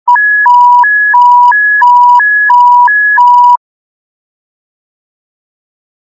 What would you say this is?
"Open Channel D", "Channel D Open". This is suppossed to be the sound of Napoleon Solo's Pen Communicator in The Man From UNCLE, the TV Spy series of the 1960's (I was a big fan as a teenager). I put this as the ringtone for members of my family whose phone calls I cannot ignore. It was created using the Ringtone Generator on a Nokia 3620 mobile phone.
Man-From-UNCLE, Alarm, TV-Sounds, Alarm-sound